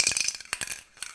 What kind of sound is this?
Queneau Bombe Peinture27
prise de son fait au couple ORTF de bombe de peinture, bille qui tourne